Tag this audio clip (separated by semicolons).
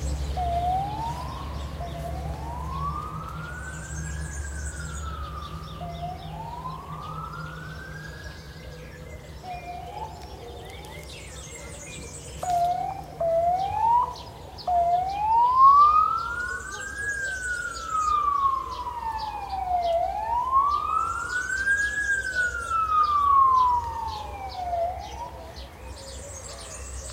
spring; nature; birds; ambulance; field-recording